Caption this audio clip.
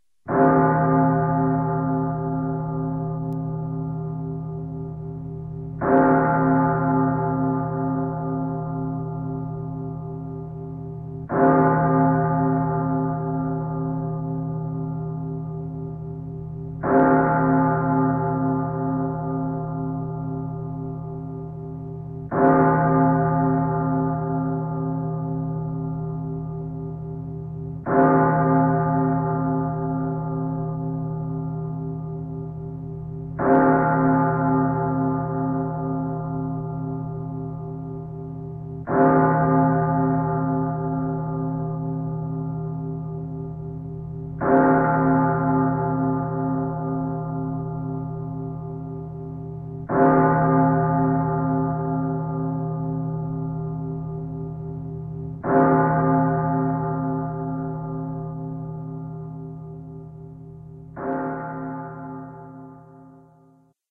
Creepy church bell
The sound of a church bell tolling in a church in Germany, recorded summer 2012.
Church-bell, Deep, Germany